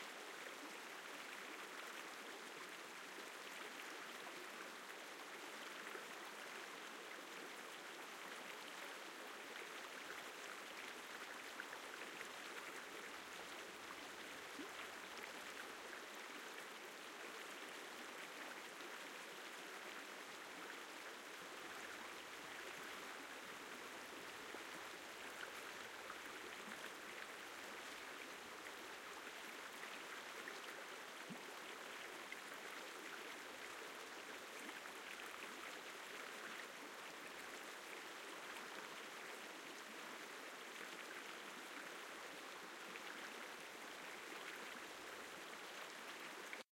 Stereo recording of a rocky mountain river in Alberta, Canada during autumn. Medium flow, small rapids, 6 foot proximity